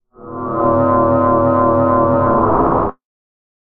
magnetic field 4
SFX suitable for vintage Sci Fi stuff.
Based on frequency modulation.
field, magnetic, magnetic-field, scifi, synth, vintage